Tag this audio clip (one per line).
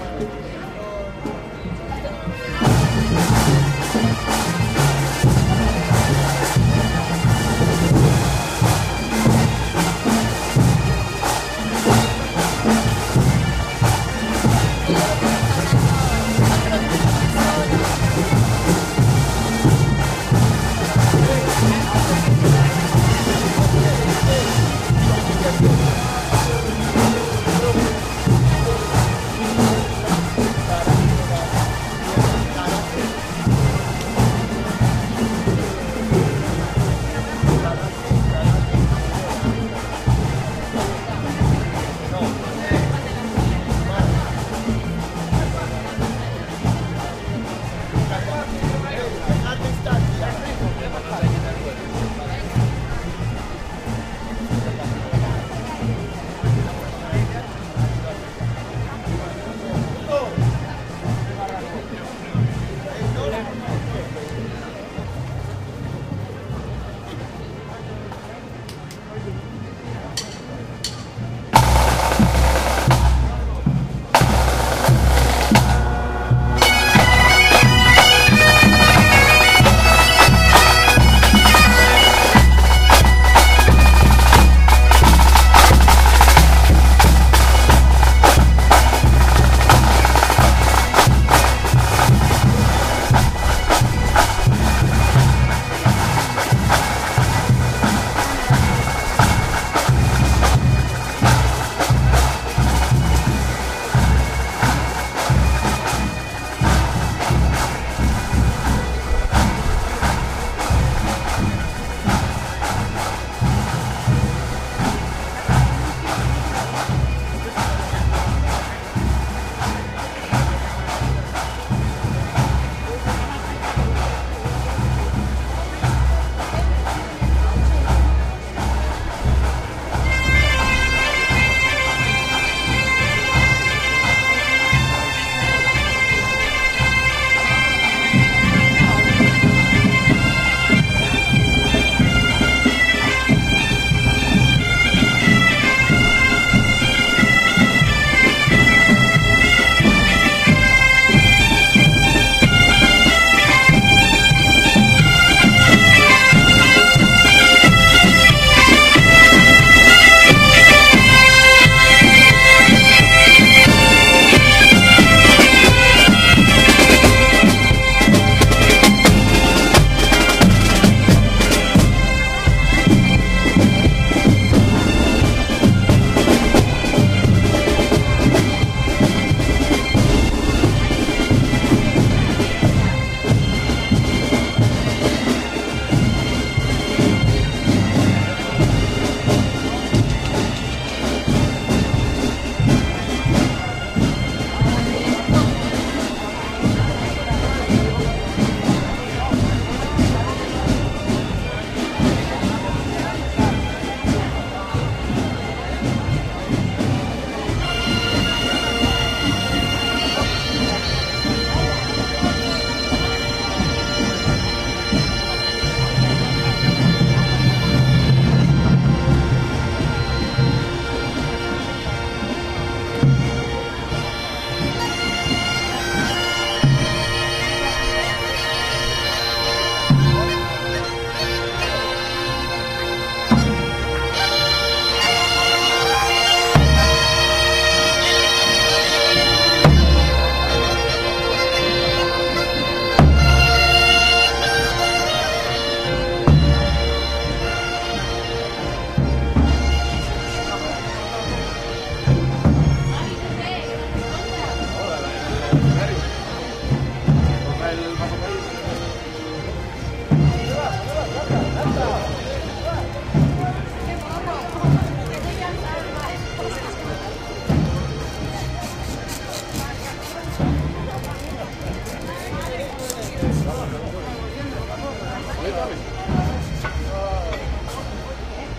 street
bagpipes
band